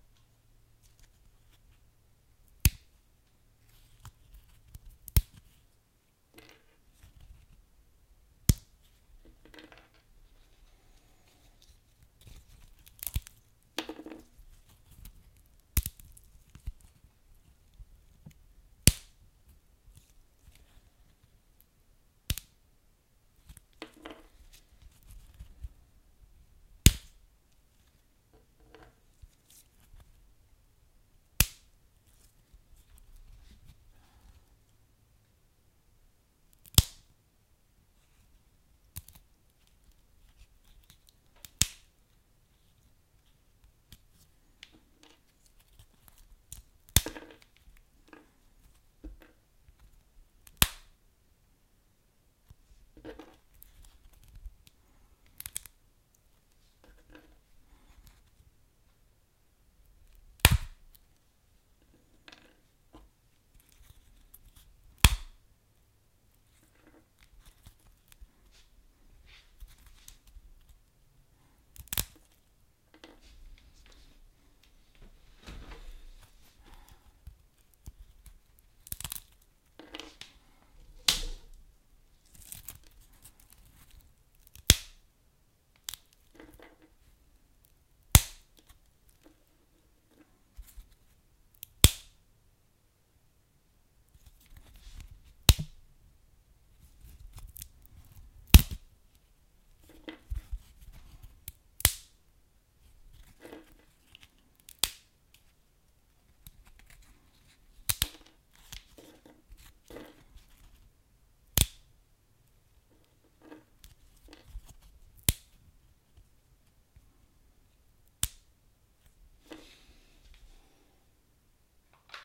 Cracking Sticks One
Sound of popping or crackling wood, log, or kindling burning in a fire. Recorded on a Rode mic and Zoom H4N Pro.
burn, crack, crackle, fire, fireplace, flames, kindling, pop, snap, wood